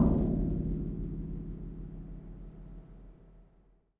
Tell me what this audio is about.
Lift Percussion 1
Metallic lift in Madrid. Rough samples
The specific character of the sound is described in the title itself.